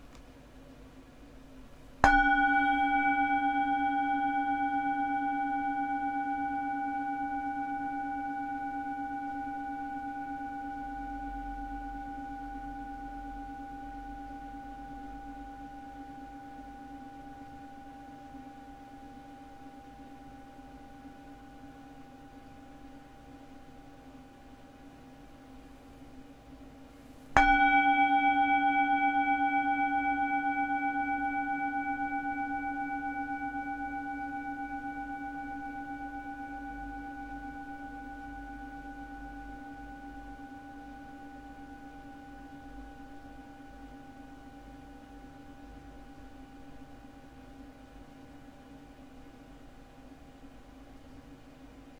The resonance of a soundbowl
Home, Resonance